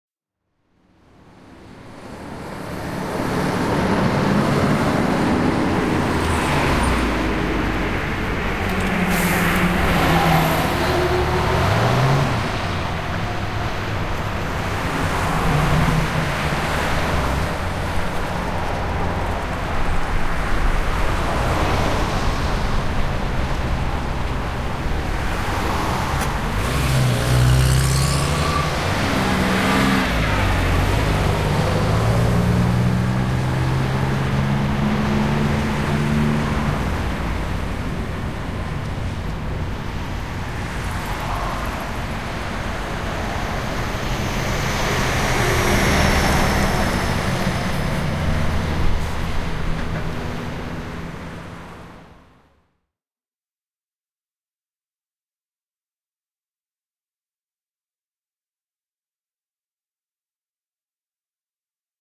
Traffic mel 2
field-recording, italy, main-street, traffic